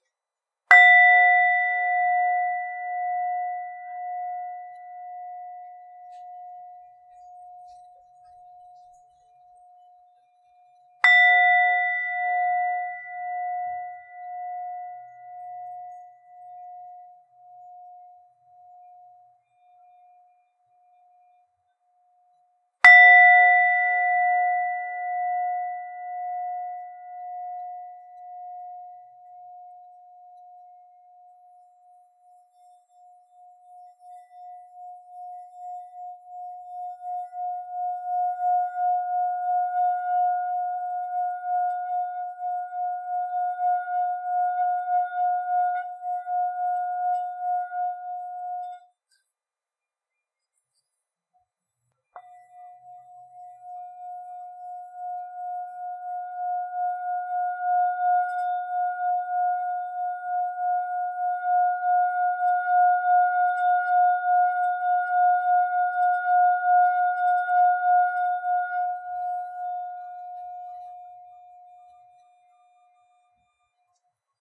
Nepal Singing Bowl
A small singing bowl from Nepal. Struck 3 times and let ring. Then sung twice with a leather wrapped beater. Pitch is somewhere between F and F# with plenty of overtones.